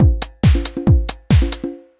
A nice house beat. I really like this one. They were all arranged in LMMS.
thanks for listening to this sound, number 67303
beat, loop, house, break, bpm, 138